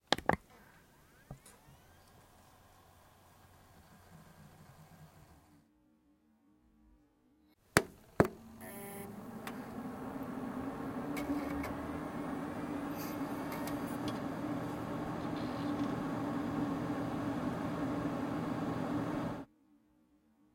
PC, start button + heating; 2 versions
Two versions of the start button of the PC. Plus heating.